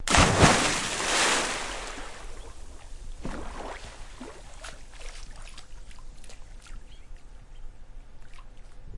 POOL CANONBALL DIVE 4
-Canon-ball into backyard pool
canon, canon-ball, canonball, dive, diving, pool, splash, splashing, swim, swimming, water, waves